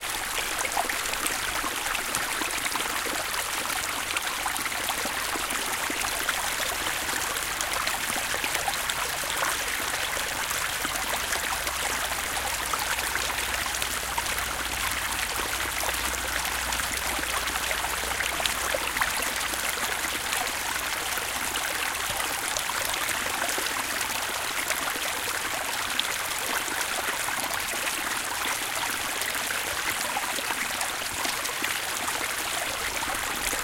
small river
Recorded in south germany, black forest- Zoom H4N
Nature, Peaceful